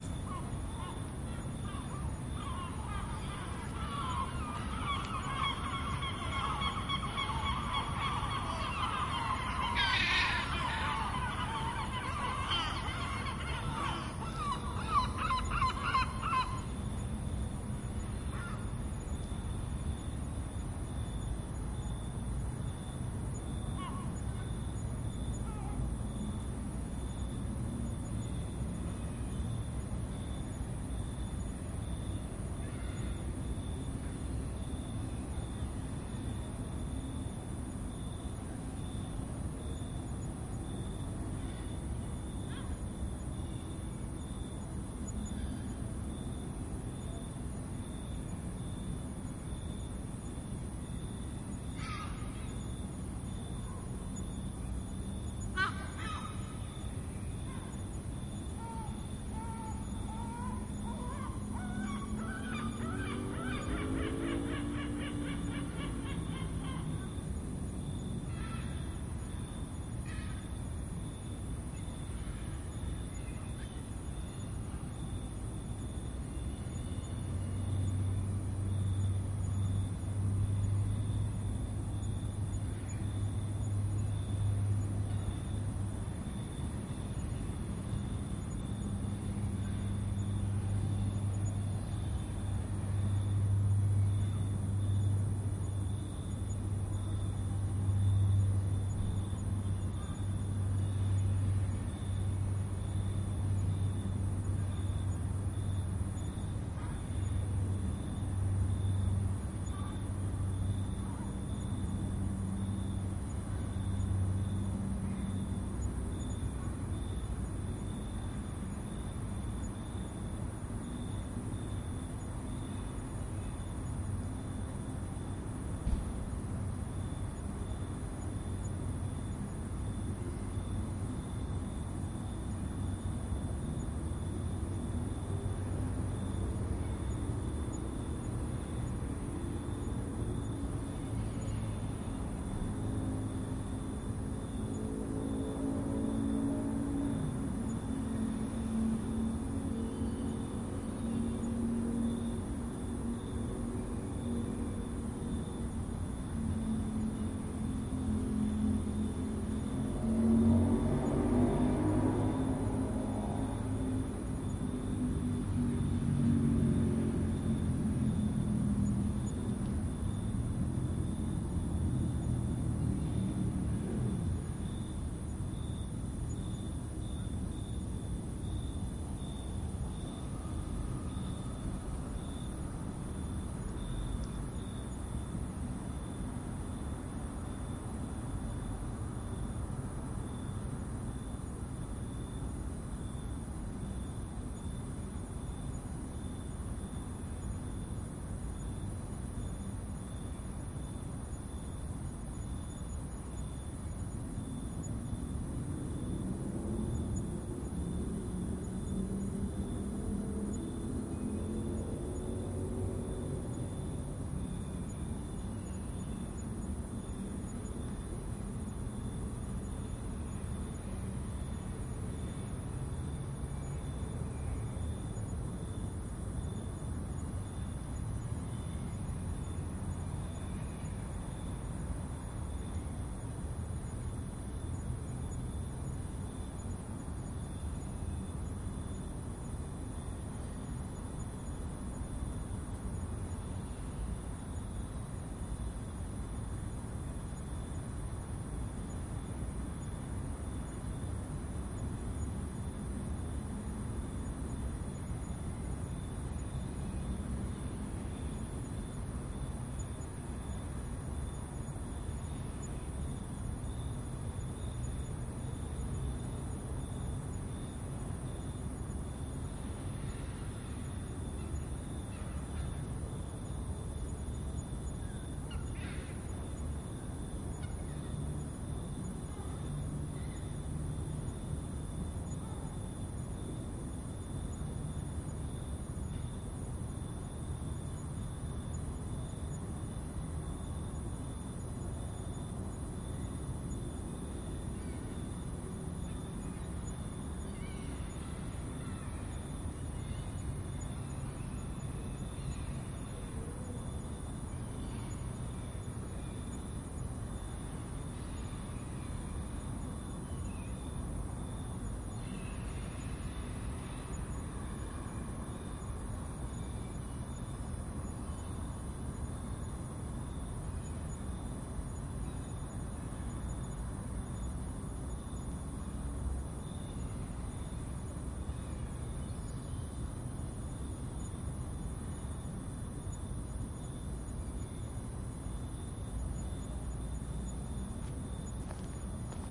VELESAJAM NOC ZRIKAVCI SAOBRACAJ GALEBI SE MITARE NA POCETKU
Zagreb fair open space (park) 3:00 AM, river seagulls fighting and crawling, some traffic in bgnd. Some crickets and cicada. Dawn.
Recorded with my tascam dr-05.
ambiance, crickets